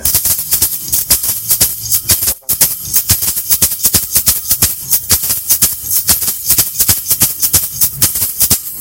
En las parrandas usan como parte de la percusión menor, el chapero un instrumento fabricado de con chapas de metal fijados con clavos en un trozo de madera. Se toca golpeando el chapero con las manos como se hace con la pandereta. Este sonido fue grabado como parte de una entrevista realizada a Rafael Rondón, director del grupo "El Valle". Se realizó una grabación simple con un celular Sony y luego se editó con Audacity, se ecualizó y normalizó.
"In the parrandas they use as part of the minor percussion, the chapero, an instrument made of metal sheets fixed with nails in a piece of wood. It is played by hitting the hatboy with the hands as with the tambourine. This sound was recorded as part of an interview with Rafael Rondón, director of the group "El Valle".